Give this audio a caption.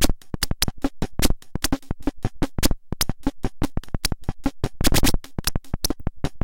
Mangled drum loop from a circuit bent kid's keyboard. This one is severely glitched in random places and doesn't loop quite right.